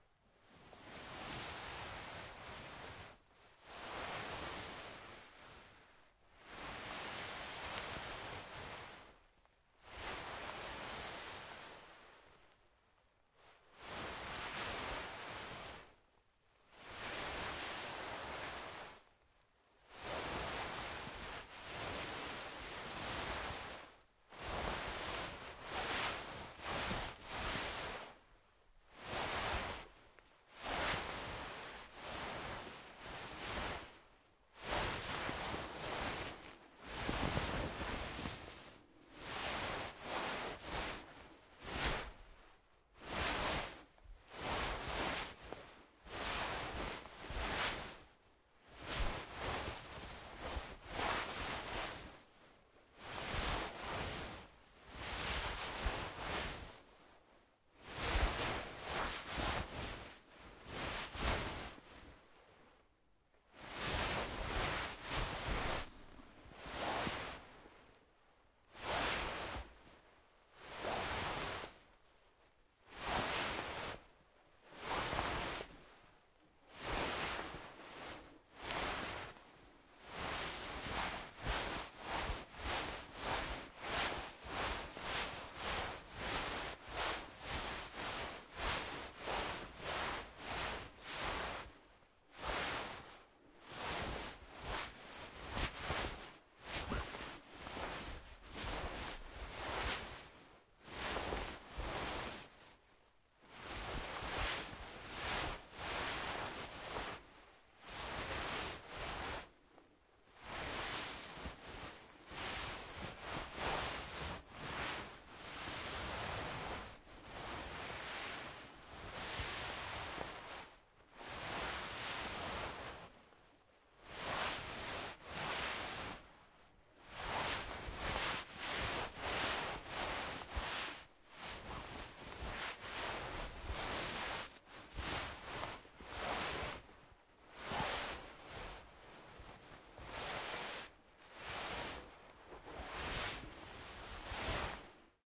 fabric movement wool
fabric/clothes movement (Foley)- heavy wool coat.
MKH60-> ULN-2.